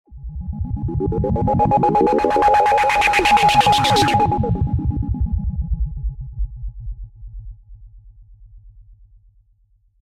Digi Sweeper 1
Radio Style Sweeper Created in Logic Pro X using the ES1 Synth. Modification of the Bright Swell Pad